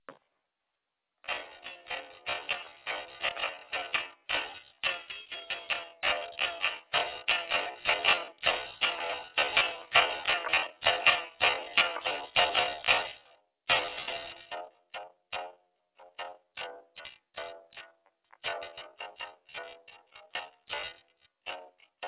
A high pitched snippet of a guitar recording of mine